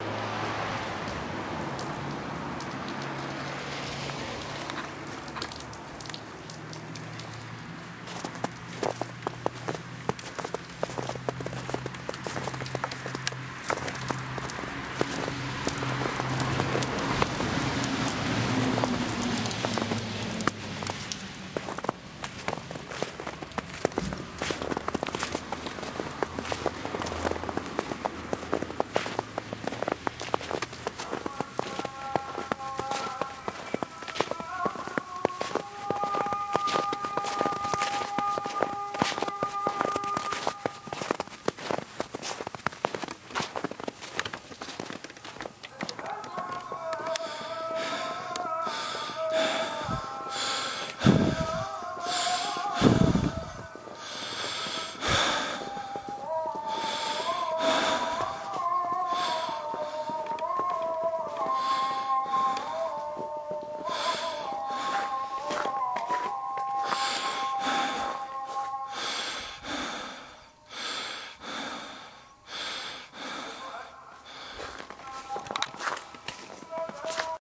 Walking On Snow
Last Night When I went Out For Photography, I Decided To Record The Sound Of My Foots When They Was Sinking In The Snow.
snow, breath, night, winter, sreet, walking